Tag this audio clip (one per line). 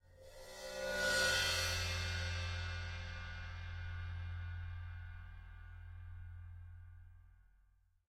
splash
one-shot
paiste
ride
bowed
zildjian
crash
special
sound
beat
metal
groove
meinl
drums
bell
hit
drum
sample
china
cymbal
sabian
cymbals
percussion